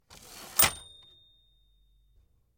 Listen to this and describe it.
typewriter manual carriage ring
Sound of the carriage with ringing from a manual typewriter.
Recorded with the Fostex FR-2LE and the Rode NTG-3.